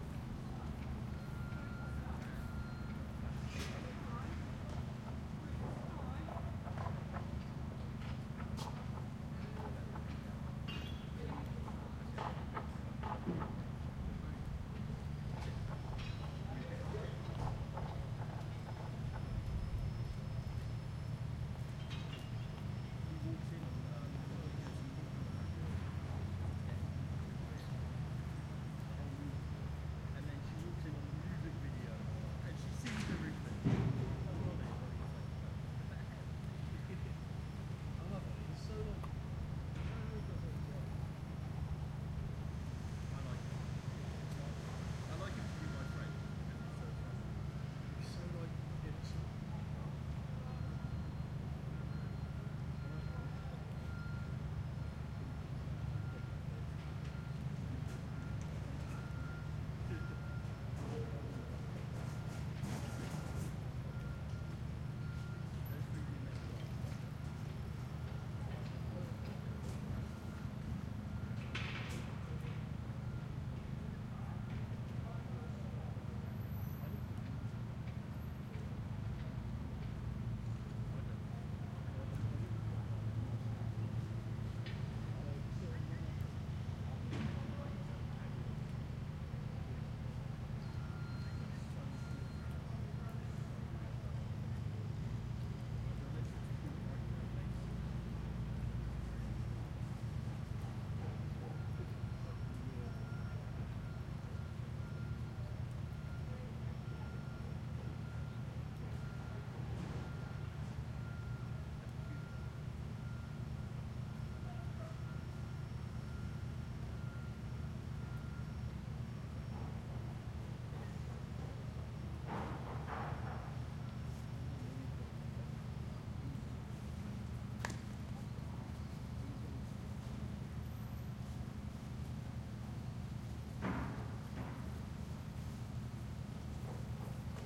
06 Winchester Cathedral park 2
A trip to the lovely english town of Winchester, on a lovely autumn morning...
Town, Winchester, Urban, Field-Recording, People, Serene, Park, Busy, Leisure